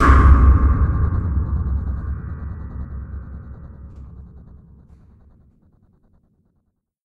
Dramatic Hit
Dramatic Bass Hit by snakebarney
bass, metal, abstract, effect, hit, cinematic, epic, bang, horror, dramatic